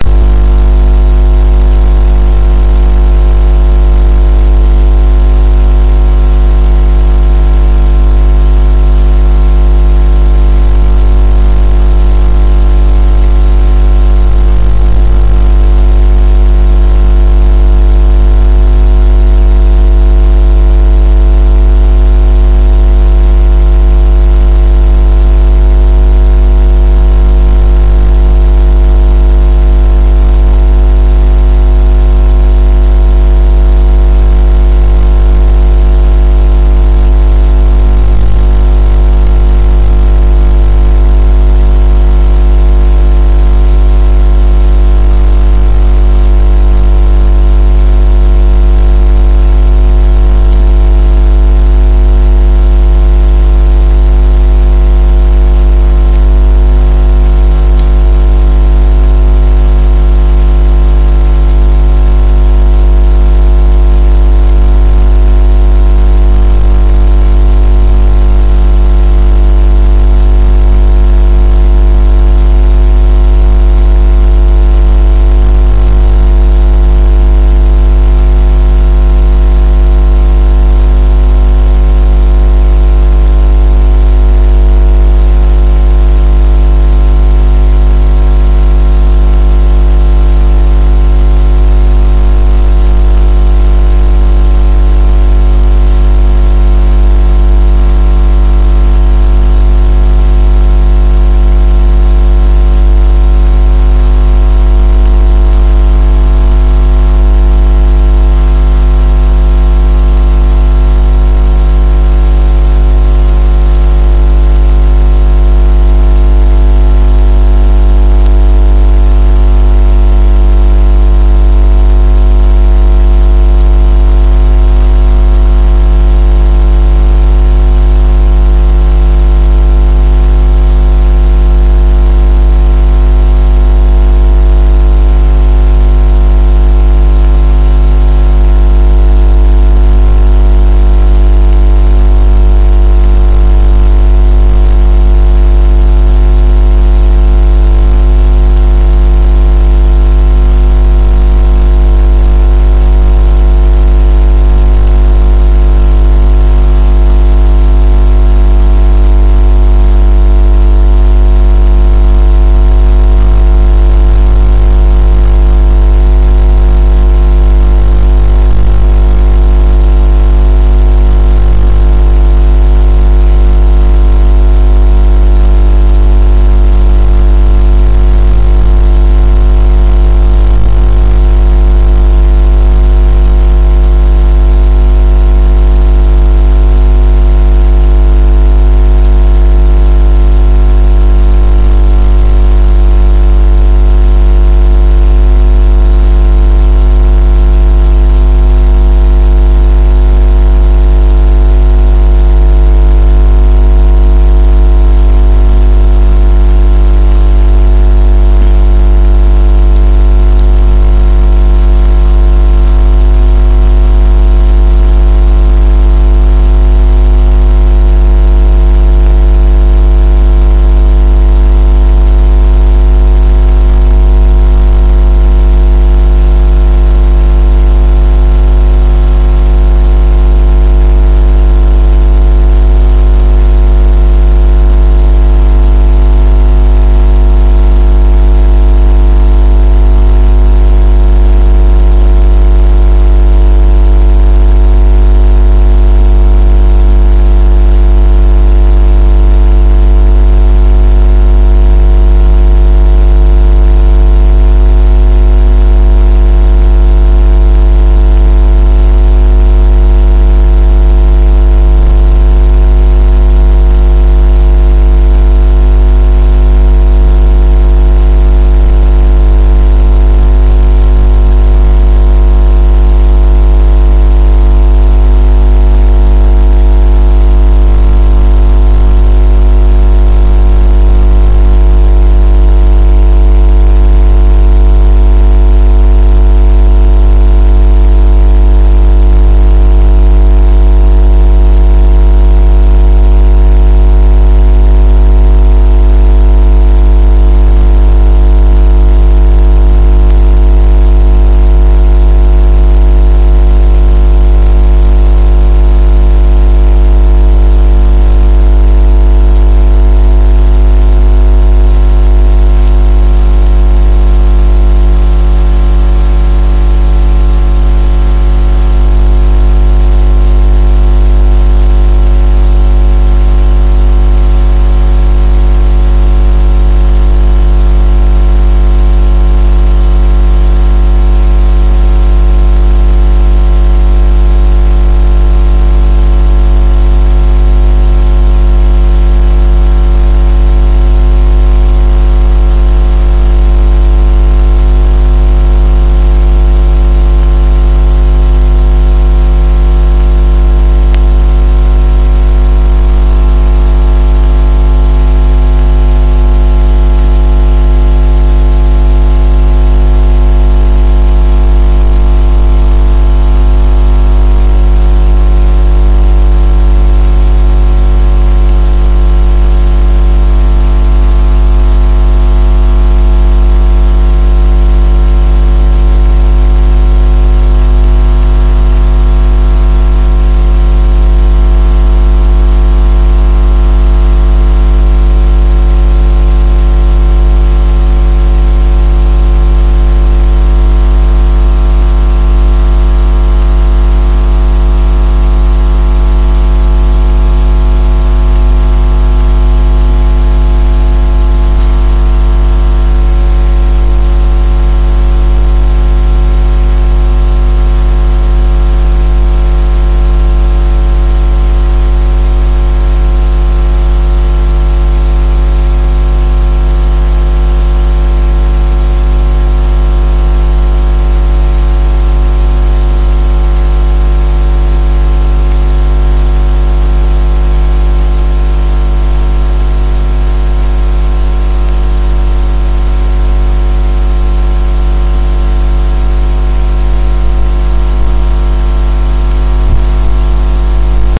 ECU-(A-XX)108+
Jitter, Path, Channel, Shelf, Trail, Track, Horizon, Control, Reptile, Rheology, Iso, Battery, Raspberry